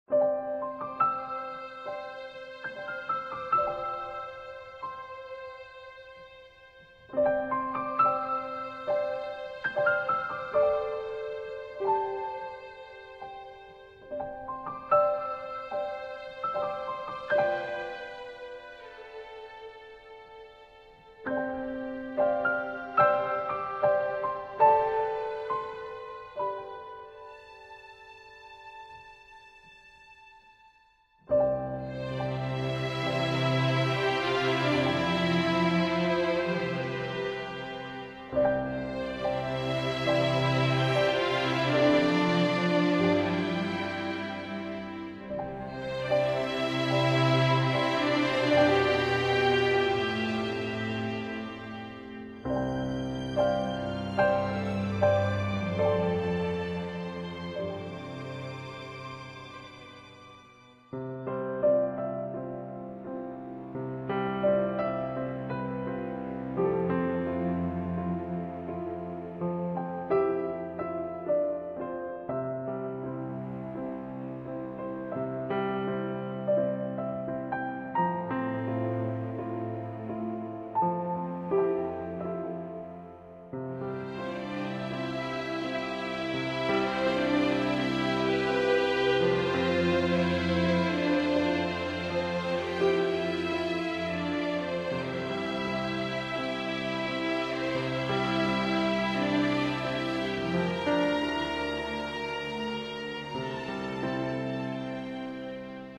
Emotional Orchestra (Korean Drama)
Genre: Drama, Emotional, Romance.
Track: 72/100
Orchestra Based Music
Romance, Film, Drama, Orchestra, Background, Music, Emotional